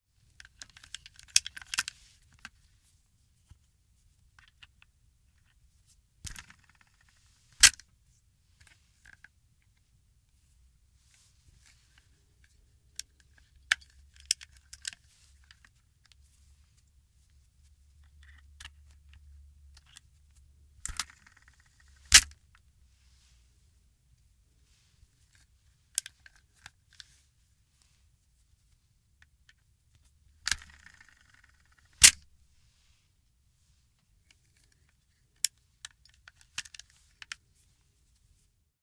Loading a revolver and spinning it shut. Several times.
foley, gun, loading, revolver, spin